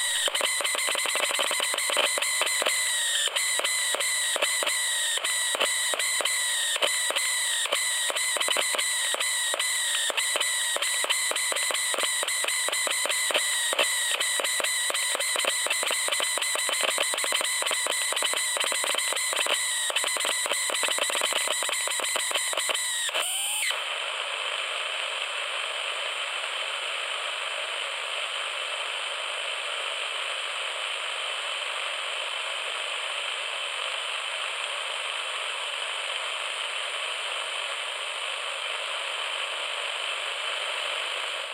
Lo-fi AM/FM radio (Mores code terror)
Lofi radio sound recorded with 3 EUR cheap radio unit.
Recorded with TASCAM DR-22WL.
In case you use any of my sounds, I will be happy to be informed, although it is not necessary.
am, distortion, electronic, field-recording, fm, frequency-sweep, glitch, industrial, interference, lo-fi, lofi, noise, pulsating, radio, shortwave, static, transmission, white-noise